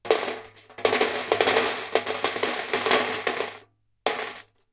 Rhythmic sounds of glass mancala pieces in their metal container.
clatter, game, glass, mancala, metal, rhythm